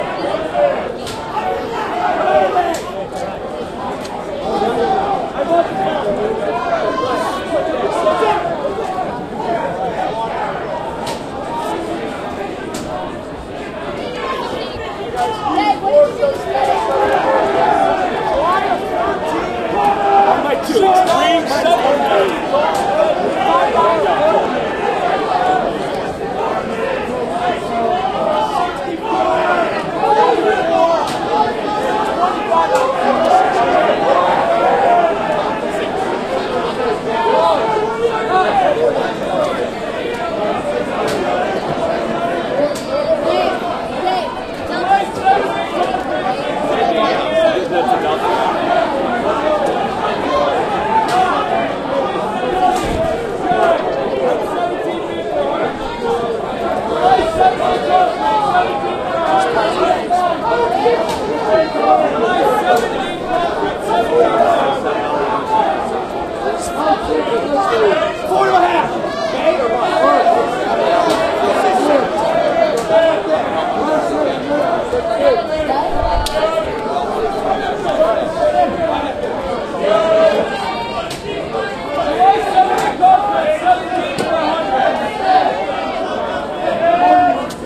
Sounds from a stock exchange trading floor, men and women voices, yelling, shouting, light conversation,